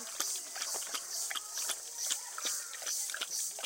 a dog drinking form a bowl